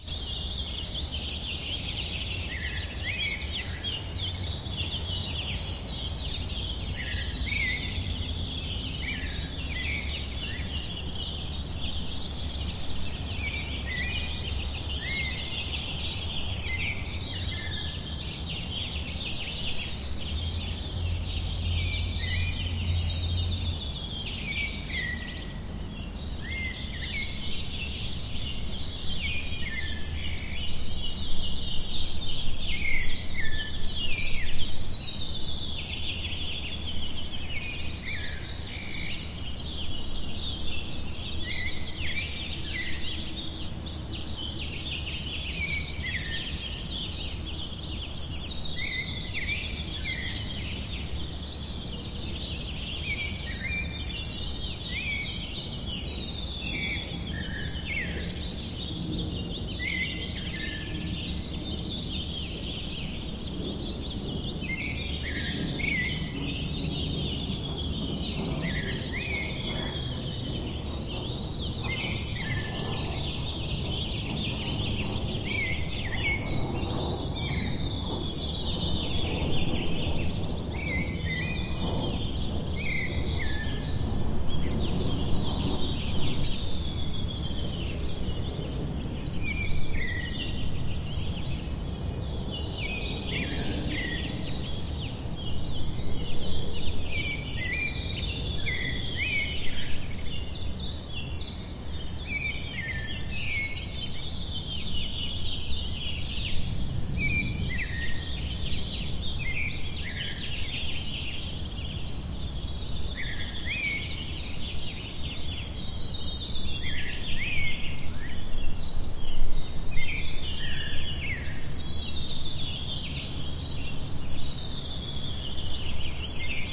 Birds singing at 7 AM on Mexico City. Recorded using an Audio Technica AT2020 microphone facing upwards.